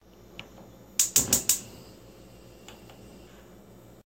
Gas-stove
The sound of a gas stove spark catching